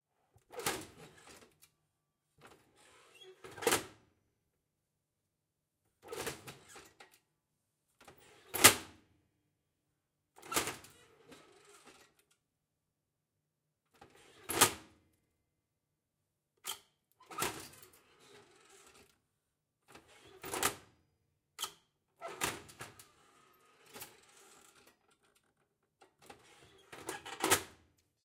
Old Electric Stove, Oven Door Open and Close, Distant
Sounds recorded from an old electric stove, metal hinges, door and switches.
cook, oven, house, sound-effect, sfx, stove, switch, cooking, kitchen, household, fx, door, metal